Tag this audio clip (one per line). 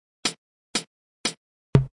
open
hats
hihat
cymbals
hi-hat
Loop
closed
step
hi-hats
hat
drums
Dubstep
hihats